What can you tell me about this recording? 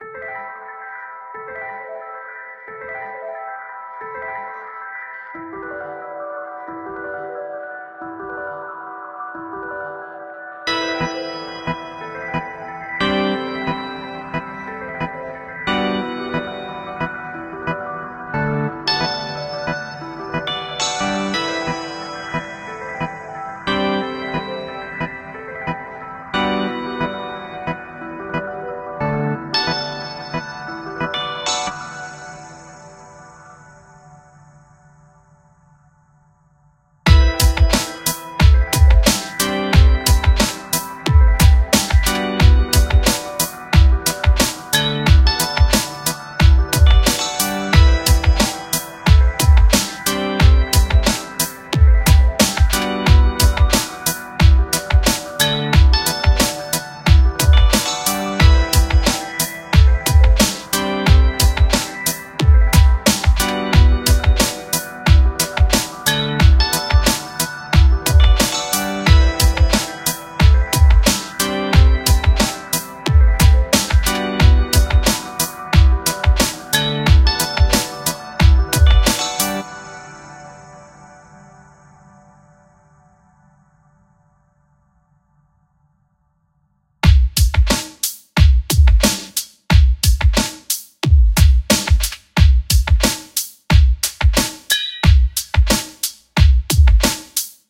Piano/Synth & Drums - (90bpm)
List of all sounds and license's below.
This sound contains loop points for seamless looping.
Drum Hits » Driven Snare by eryps
Odd Samples » Gas Bottle Perc1 by oddsamples
DIY Drum Kit » 80s Hat by Hard3eat
Electronic Closed High Hats » Electronic Closed High Hat #1 by IanStarGem
kicks & bass sounds » Punchy Trance Kick 2 by waveplay
snare by ojirio
bass, beat, dance, drum, drum-loop, drums, groovy, hat, kick, loop, percussion, piano, rhodes, snare, synth